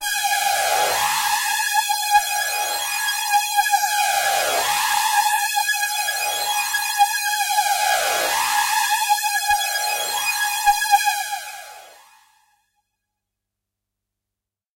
This is a sample from my Q Rack hardware synth. It is part of the "Q multi 008: Dirty Phaser" sample pack. The sound is on the key in the name of the file. A hard lead sound with added harshness using a phaser effect.